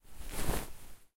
Blanket Movement 6
A down doona/duvet being rustled. Stereo Zoom h4n recording.
blanket, cloth, clothes, clothing, doona, down, duvet, fabric, foley, h4n, material, movement, moving, pillow, rustle, rustling, sheet, sheets, shirt, stereo, swish, textile, zoom, zoom-h4n